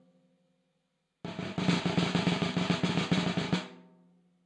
Snaresd, Snares, Mix (3)
Snare roll, completely unprocessed. Recorded with one dynamic mike over the snare, using 5A sticks.
roll, acoustic, drum-roll, snare